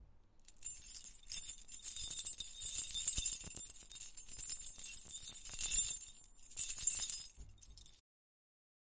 I'm a student studying sound and I and recording sounds this is one of the recordings.
This sound was created by shacking house keys rapidly.